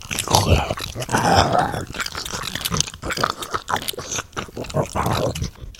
Zombies eating a corpse
splatter, horror, eating, gore, flesh, carnage, corpse, body, zombies, blood, zombie